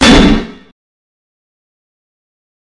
Digi gun3
hi-hat distorted
digital, fx, harsh